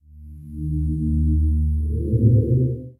Sonified stock prices of Microsoft competing with Google. Algorithmic composition / sound design sketch. Ominous. Microsoft is the low frequency and Google the higher.